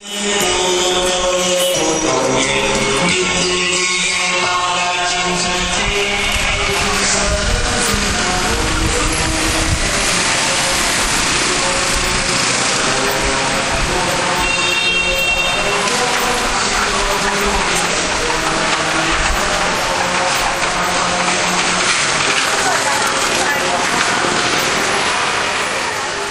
street noise in southern chinese shantou town. a beggar cart playing a mantra.